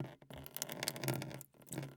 drip on plastic001
Drip recorded in an anechoic chamber using a Studio Projects B-1 LDM into a MOTU 896. Unprocessed.
The drips are coming from a bottle about 30 cm above a plastic bucket.
First one drip and then quite a few more in very short succession allmost a tiny stream.
anechoic-chamber, bucket, drip, field-recording, plastic, purist, water